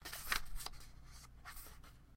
Page Flip
The sound of a page turning.
Page, Book, Turn, Paper